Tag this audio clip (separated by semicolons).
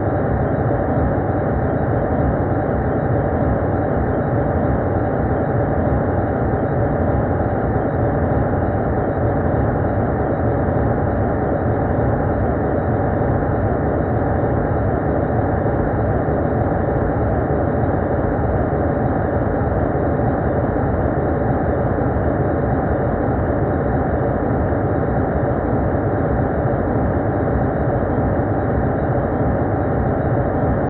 Ambient; Atmosphere; Cave; Cinematic; Dark; Development; Dreamscape; Drone; Experimental; Film; Free; Game; Loop; Noise; Rain; Sand; Sandstorm; Storm; Waterfall